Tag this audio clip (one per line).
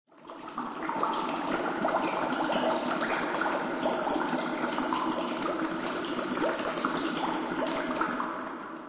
drip
splash
water
wet